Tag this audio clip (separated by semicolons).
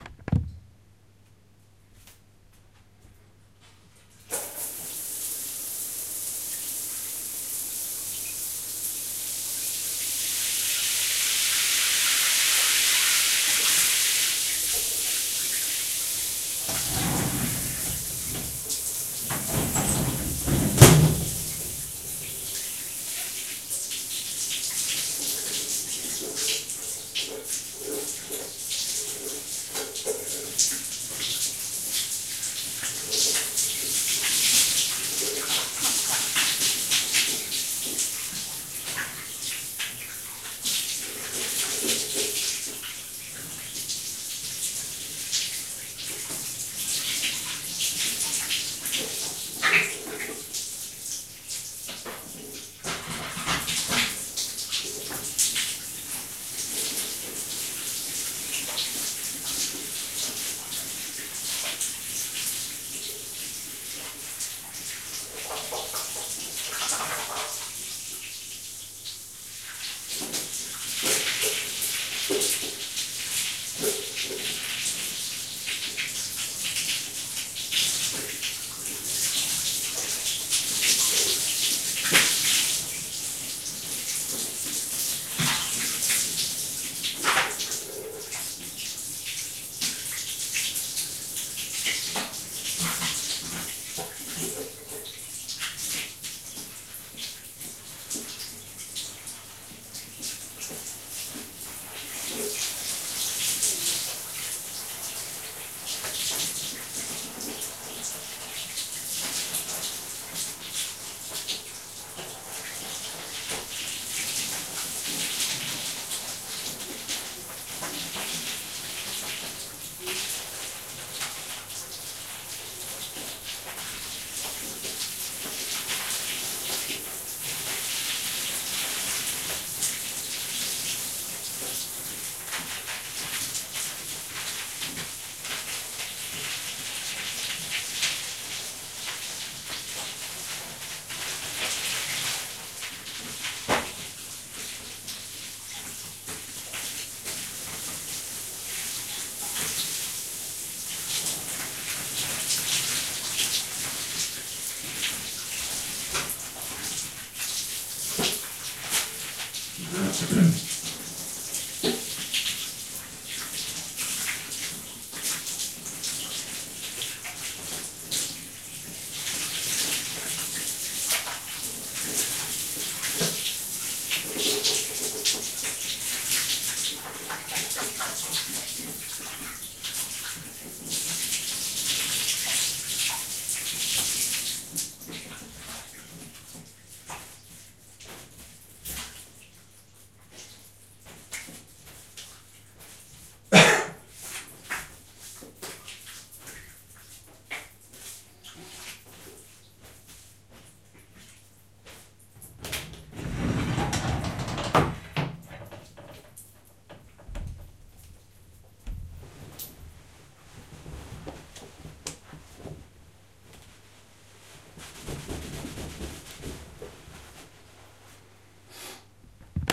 background; morning; Shower